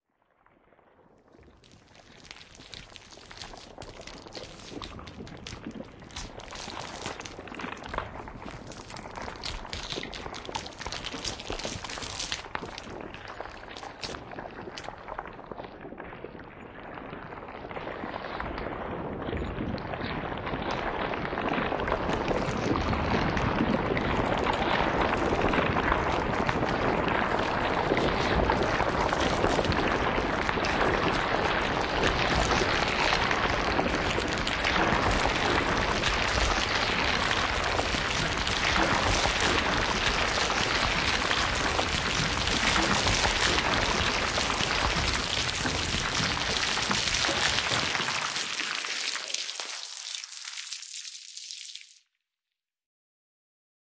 cauliflower compilation3
The cauliflower samples from the vegetable store sample pack were compiled in a one minute arrangement. Some pitch-alterations (mainly lowering randomly per track) were added in busses for the broader sounds. Furthermore a reverb to juicy it up. A stacked version of the compilation was added and some equalizing processing at the end.